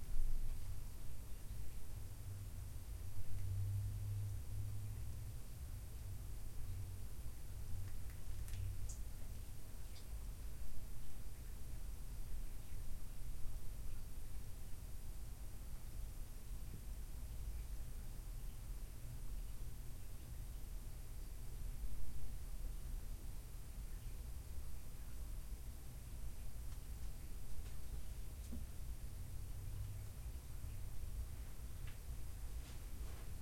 room tone quiet low ceiling cellar unfinished basement drips and light water pipe hiss +some concrete shoe grit
unfinished, hiss, drips, quiet, low, water, light, pipe, ceiling, room, tone, cellar, basement